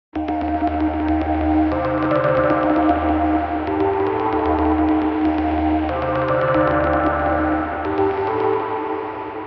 Nord Low3 Dirty
Nord Lead 2 as requested. Basslines are Dirty and Clean and So are the Low Tone rhythms.
acid, ambient, backdrop, background, bassline, electro, glitch, idm, melody, nord, rythm, soundscape, synthesizer